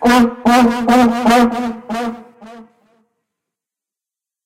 Sound of Vuvuzela